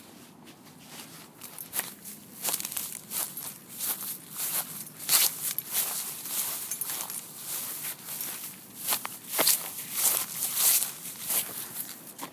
Walking through grass
grass, footsteps, walking